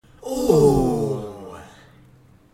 "OOOH" group of males sound
sound of men empathising with someone who has had accident
crowd, pain, sympathy